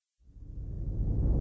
missle hit
camera, close, explosion, missle, zoom